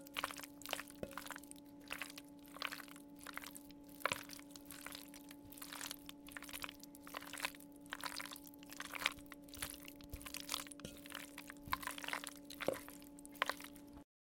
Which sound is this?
stirring pasta batch1 bip
Cooked rigatoni (pasta noodles) being stirred in a ceramic bowl with a wooden spoon.
cooked-pasta, noodles, sauce, squelch, bowl, wooden-spoon, ceramic, stir, thick, squish